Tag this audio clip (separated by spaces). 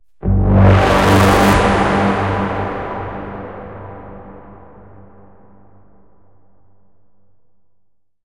cinematic dark dramatic film movie scary sci-fi sfx sound-design synth